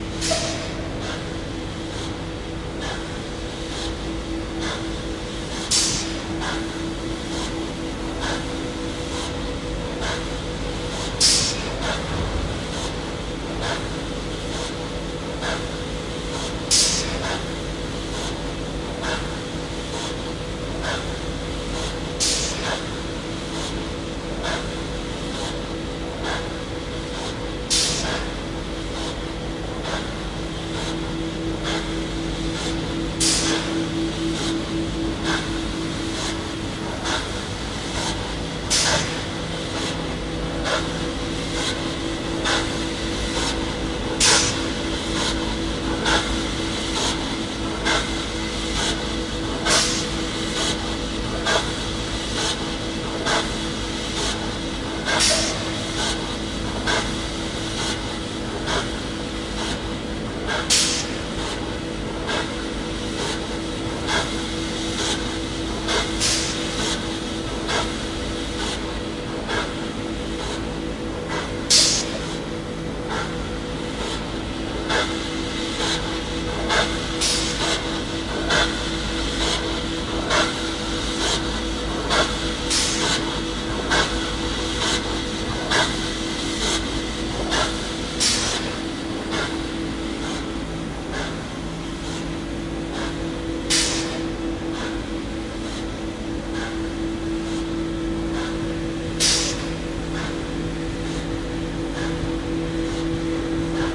zuchtende pompende kronos
This mesmerizing recording is a mono recording of a hydraulic pump recorded in the Kronos factory in Ghent. It was recorded with a Sanken CS3e on a Roland R-26 on the 10th of december 2014.
drone, factory, hydraulic, industrial, machine, machinery, mechanical, pump, robot